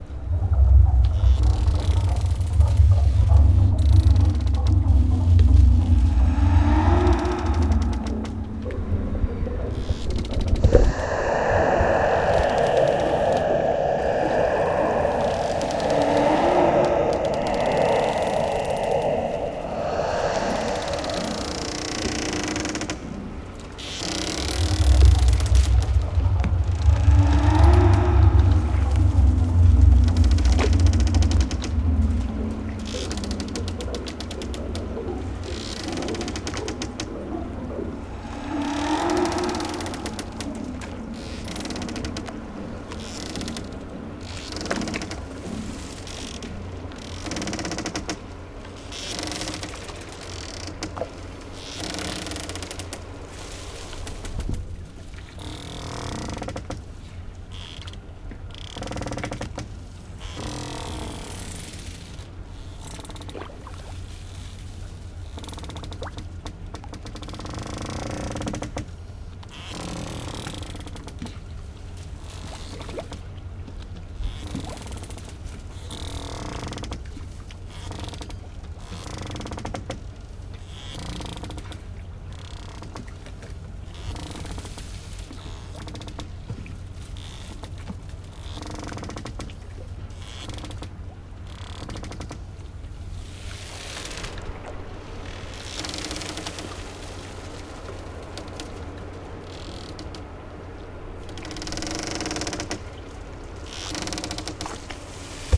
GHOST SHIP AMBIENCE
GHOST SHIP ATMOSPHERE
Deck of a ship on the high seas with ghostly sounds and rustling cables.
AMBIENTE DE BARCO FANTASMA
Cubierta de un barco en alta mar con sonidos fantasmales y crujidos de cables.
boat, creepy, ghost, nightmare, phantom, pirate, Ship, sinister, spectre, terrifying